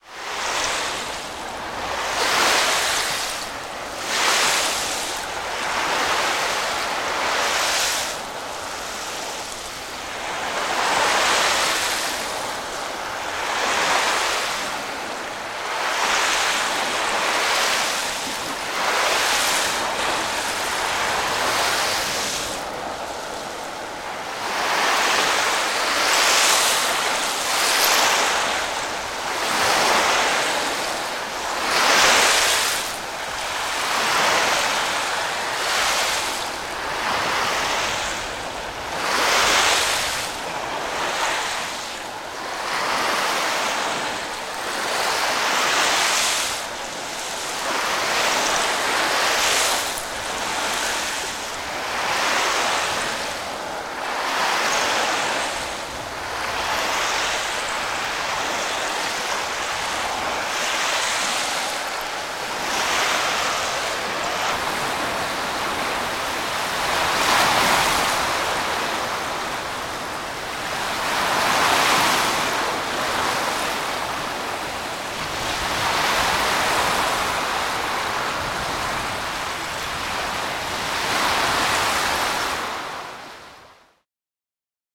Jää, jäähileet aallokossa / Ice, frostworks clinking among waves
Jäähileet aaltoilevat rantaan. Jään kilinää ja sihinää aaltojen tahdissa.
Paikka/Place: Suomi / Finland / Lapinlahti, Varpaisjärvi
Aika/Date: 01.01.1995